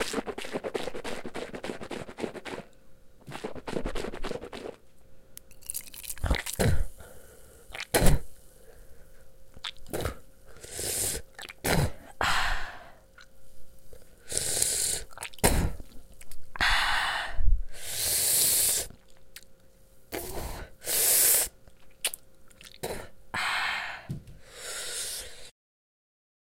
Mouth Rise
cleaning, dental, hygiene, water